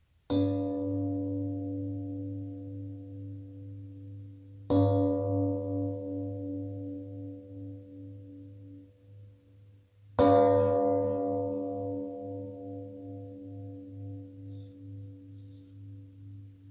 test my recorder by cellphone and pot cover